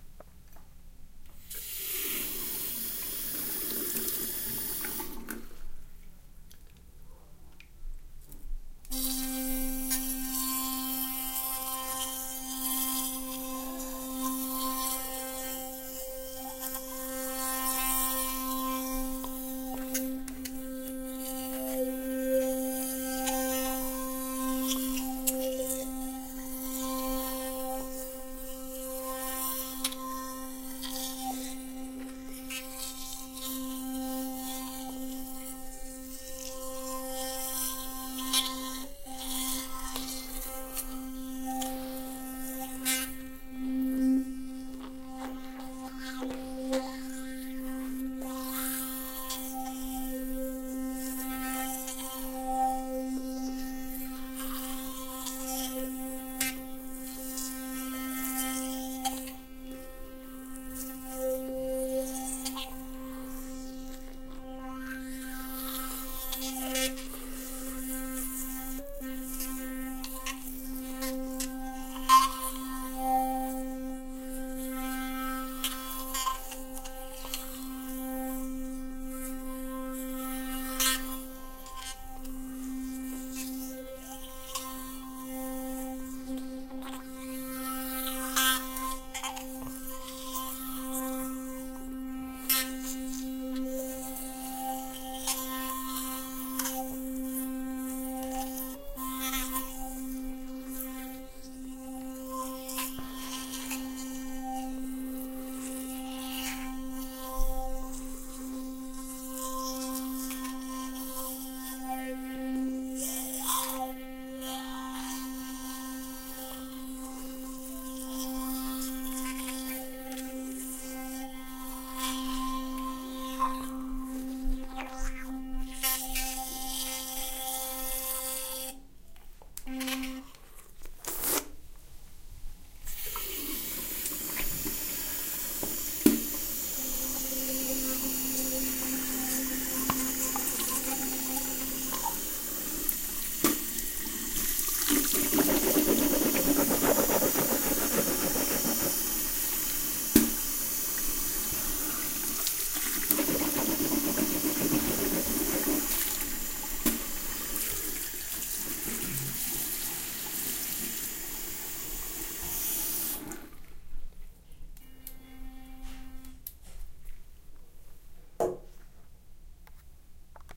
brushing my teeth with a sonicare electric toothbrush, then rinsing and spitting.